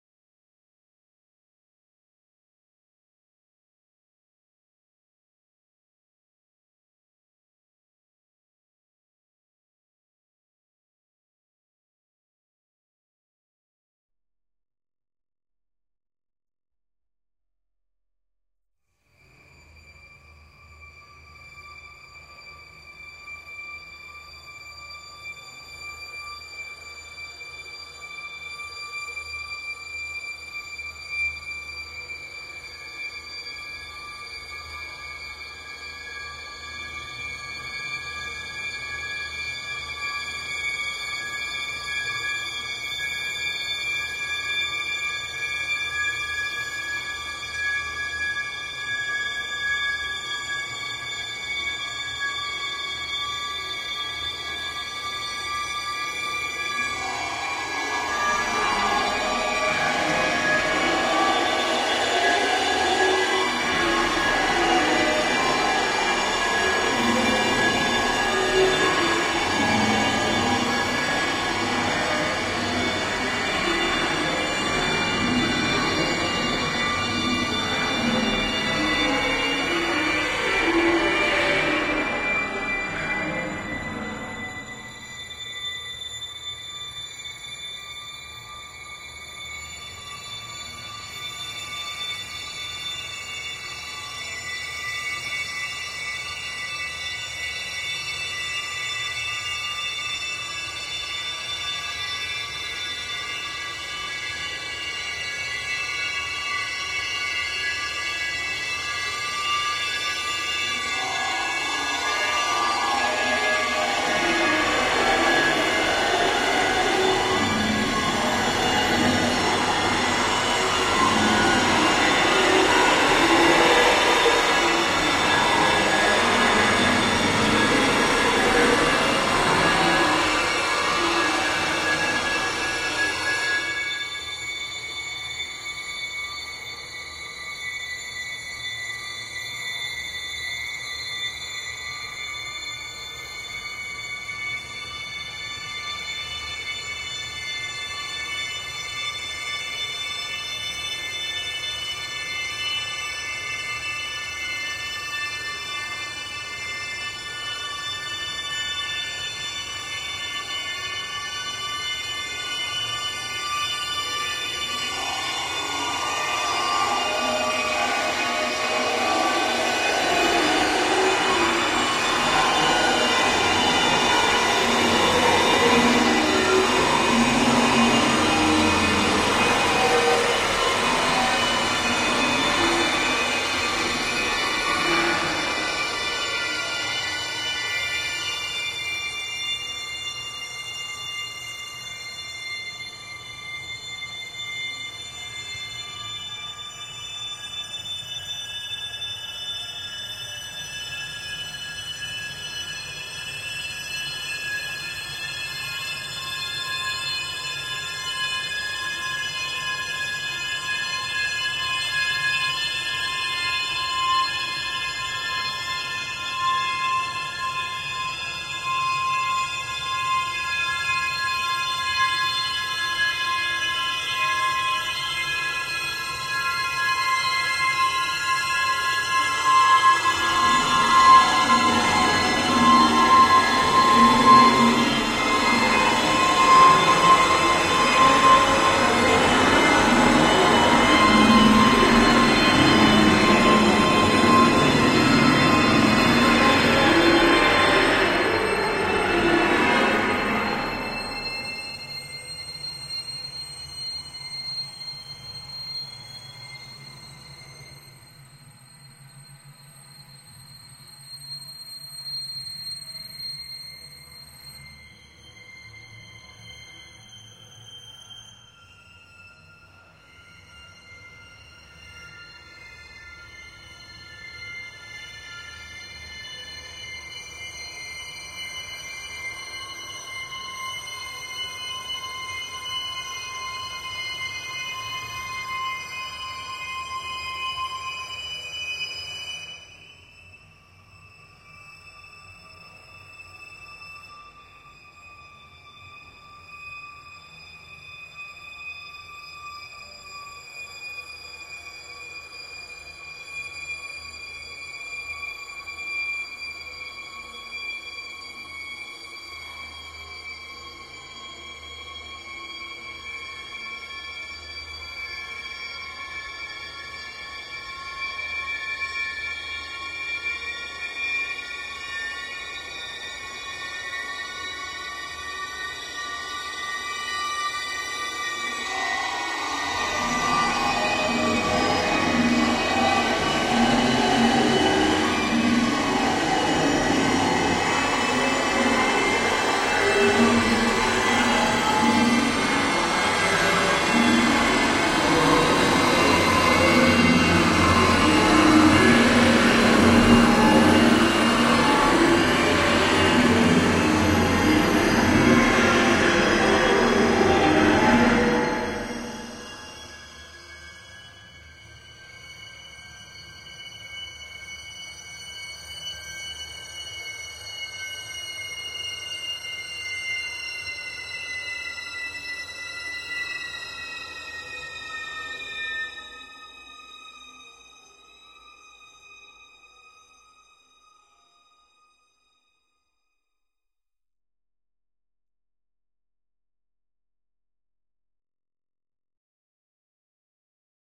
go nuts
acousmatic electronic experimental film sound-design